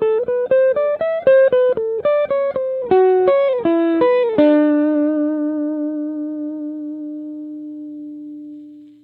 jazz guitar unprocessed fender deluxe amp and dynamic microphone
guitar, jazz